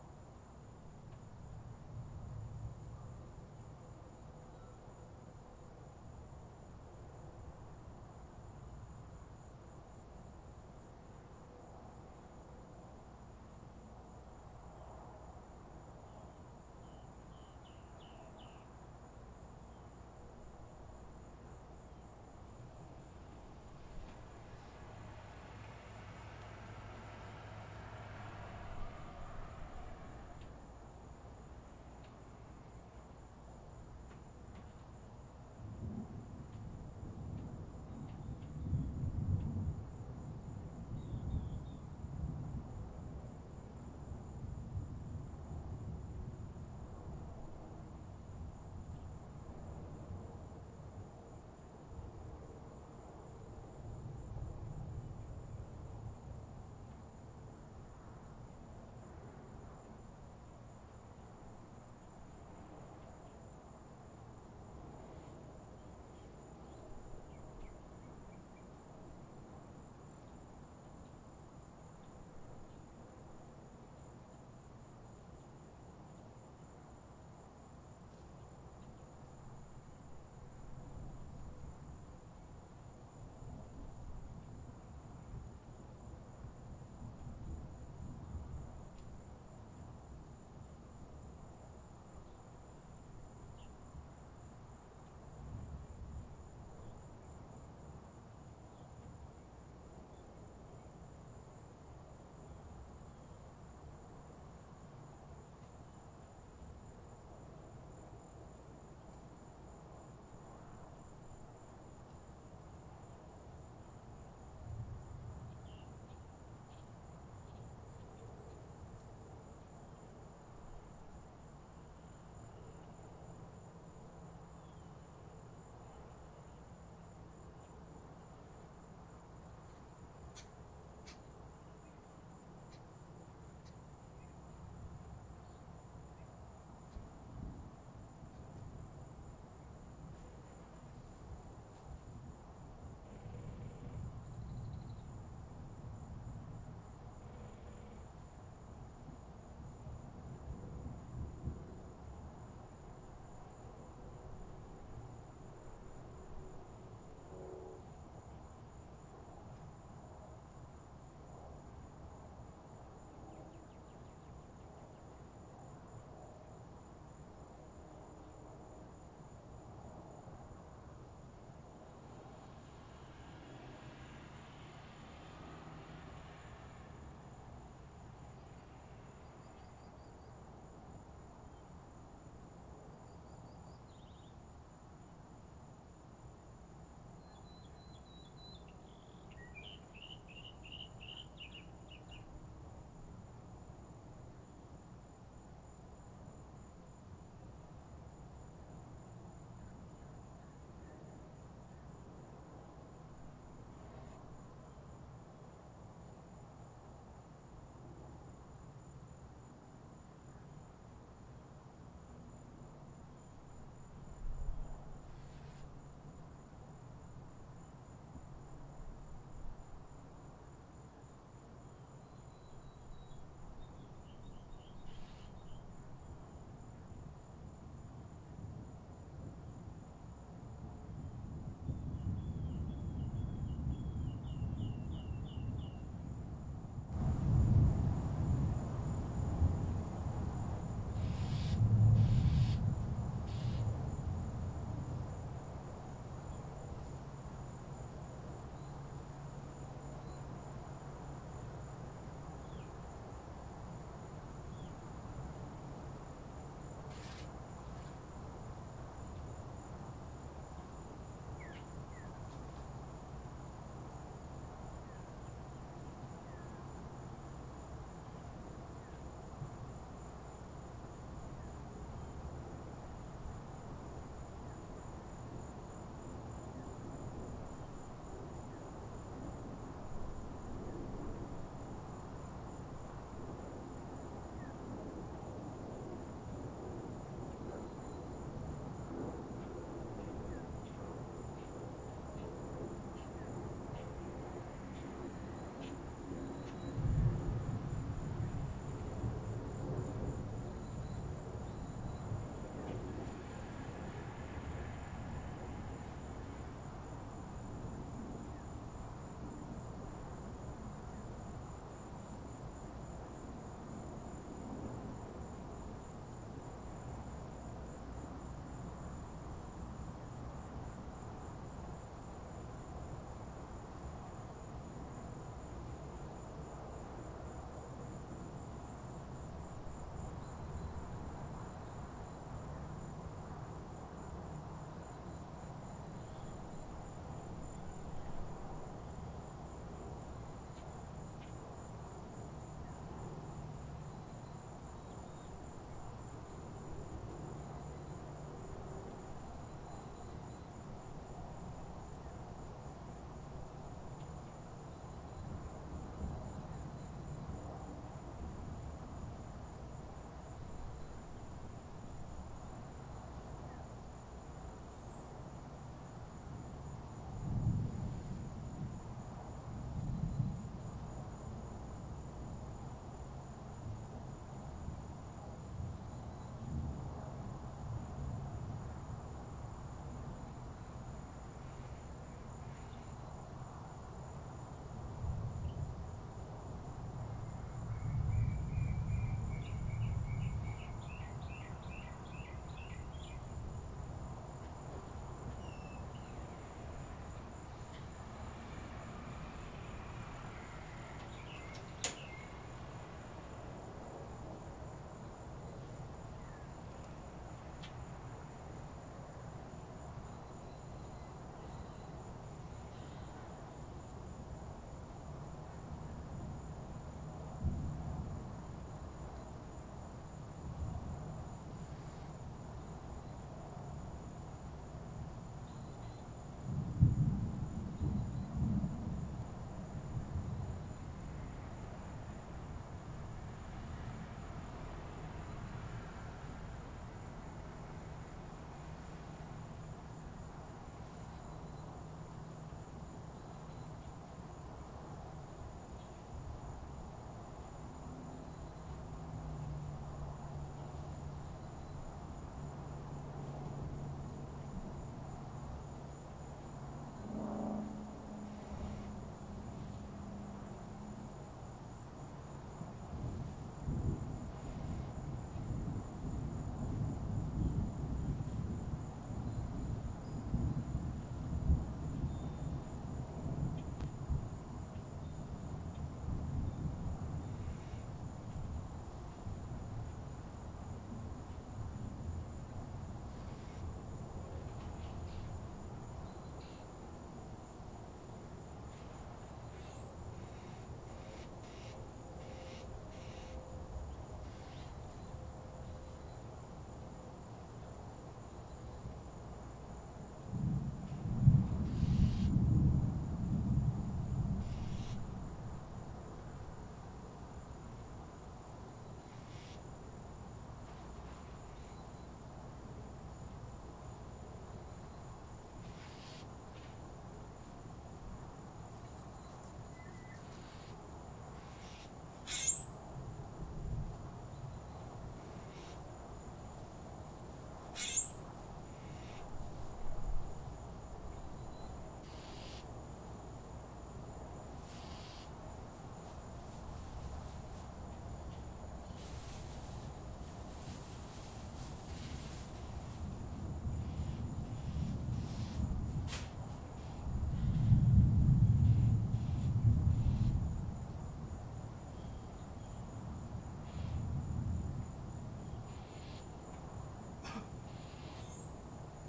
More much needed thunderstorms recorded with my laptop and a USB microphone.
rain, storm, thunder, field-recording